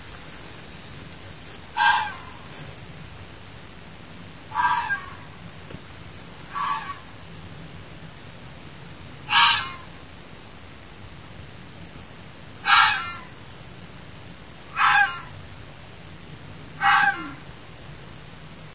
A fox call, probably a vixen calling to her cubs
alarm, ambience, call, country, field-recording, fox, nature, night, night-time, vixen